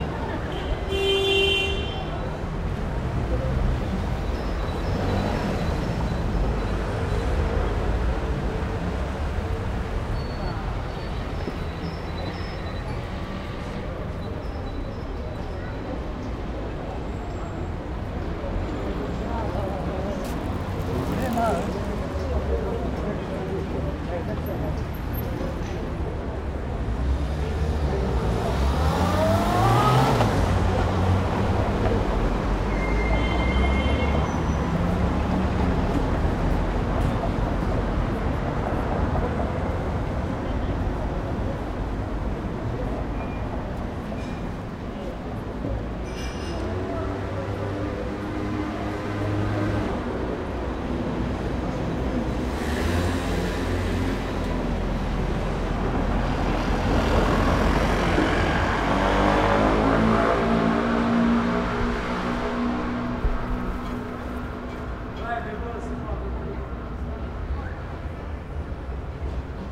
Marseille quiet street
Ambient recording of one quiet street in Marseille.
Cars, people, summer.
Setup: Sennheiser mkh60>SD MixPre>Zoom H6